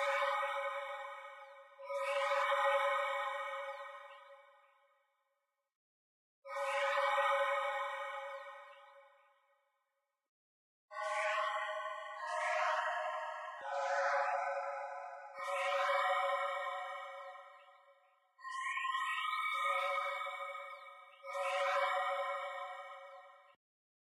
ambient, tense soundscapes and rumbles based on ambient/soundfield microphone recording inside a running train.
sci-fi, noise, strange, ambience, dark, reverb, atmosphere, cinematic, theatre, processed, train, electronic, rumble, soundscape, metro, intro, eerie, subway